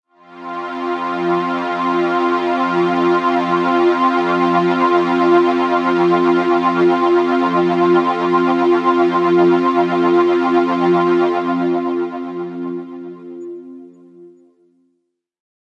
Poly Log Wobble Pad F2 - Ableton Analog subtractive patch using a square and a saw and some bandpass filters. One slow moving LFO and a faster moving LFO with a delay and long attack. These work together to bring out some cool modulation that I didn’t plan for but really like. Followed this with some additive and subtractive EQing, chorus, and Soundtoys LittlePlate